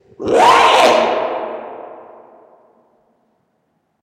Monster shriek #1
I edited my voice with Audacity to sound like a monster. I added some reverb too.